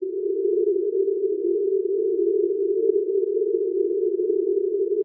Some multisamples created with coagula, if known, frequency indicated by file name.
choir, chorus, multisample, space, synth